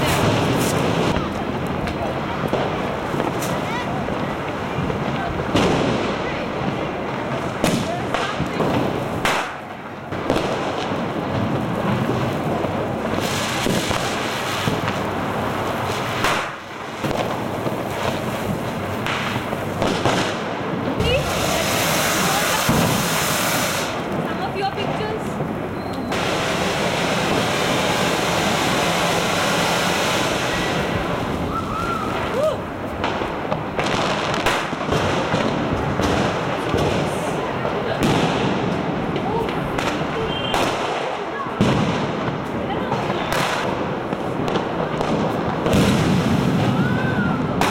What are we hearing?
India Divali Celebration Night (Fireworks, Voices, Traffic)
India Divali Celebration Night. You hear fireworks, voices and the usual road traffic.
Celebration, City, Divali, Fireworks, India, Night, Traffic, Voices